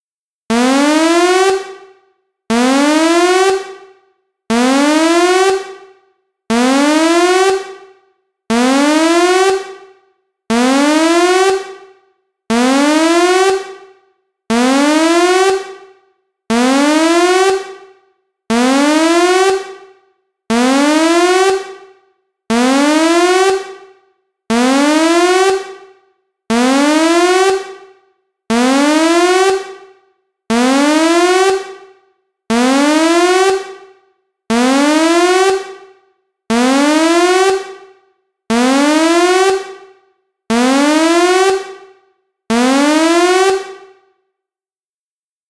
Alarm sound as being used in 1990s space craft and alien space ships of the united terran planet union.
Modeled in Audacity: 220 Sawtooth, +10 Semitones sliding shift, +silence, +Freeverb (0.3, 0.5, 0.5, 0.8, 0.4), +Normalize.